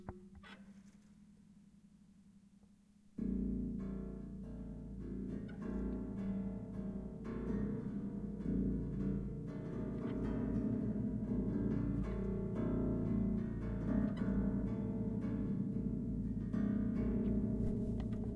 Strings sounds of piano
Pressed the sustain pedal on the piano and played on their strings inside making pizzicato.
strings, pedal, pizzicato, piano